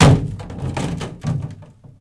Plastic, jerrycan, percussions, hit, kick, home made, cottage, cellar, wood shed
Plastic, cellar, cottage, hit, home, jerrycan, kick, made, percussions, shed, wood